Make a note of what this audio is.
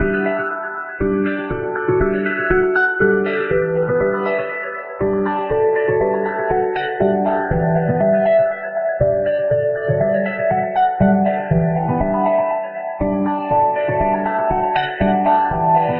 Piano Ambiance 7
beep, Sample, dance, bop, electronica, sound, music, beat, track, loops, loop, song, Manipulated, created